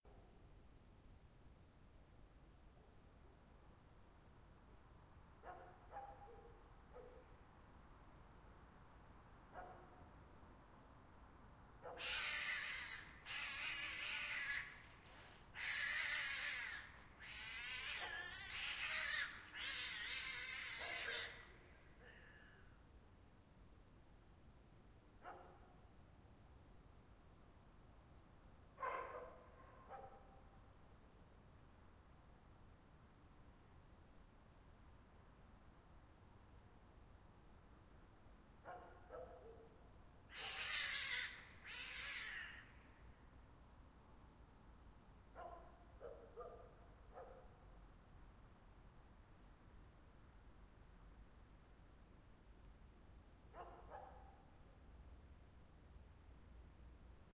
night-cat-fight
Tonight, about 0:30 GMT+2 while recording in the middle of the woods (only 2 houses around) 2 dogs were harassing some cat.
The cat is alive and safe right now (9 hours since recording have passed)
Recorder: h1n + windshield.
cat, night, dog, woods